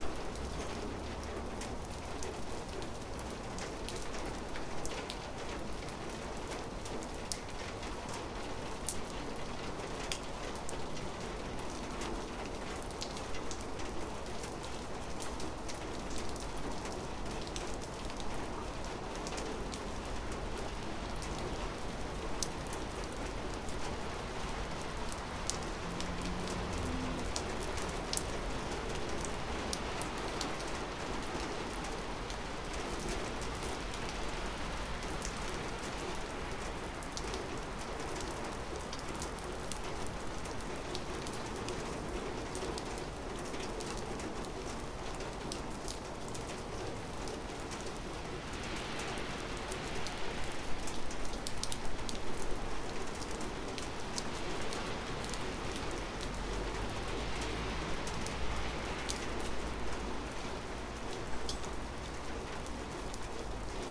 rain water drops on metal roof
water drops on the metal roof (ext.)
metal, roof